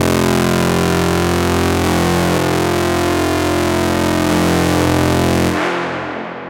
SemiQ leads 19.
This sound belongs to a mini pack sounds could be used for rave or nuerofunk genres